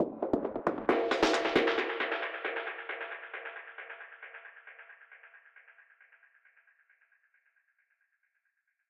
loop filtrator1
filtered percussion loop with fx